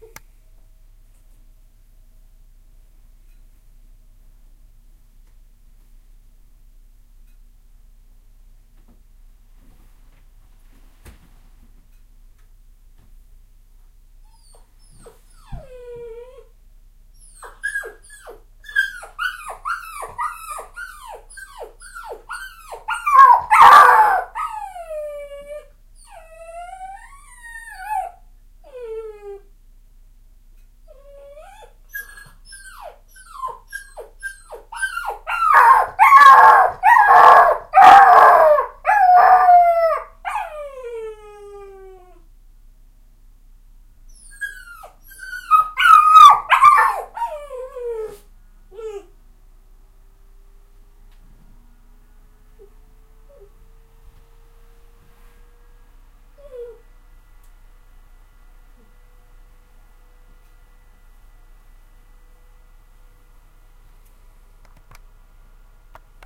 Jake the puppy
My 8 week old pup throwing a fit because I walked out of the room for about 5 seconds.
animals, cry, dog, howl, puppy, yelp